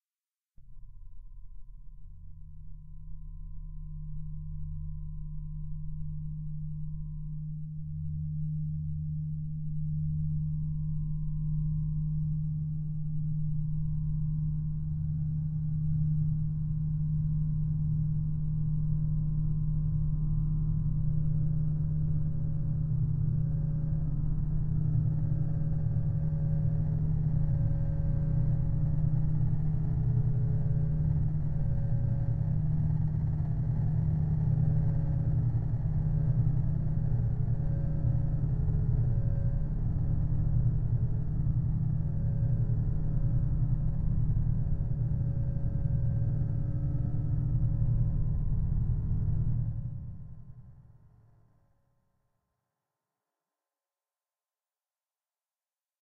A short simple waft soundscape. Dark and evil.